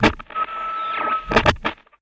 Radio Am band switch
computer recorded sound, using an old solid state radio, when changing the band. ITT.
AM, ambient, effect, radio, radio-noise, switch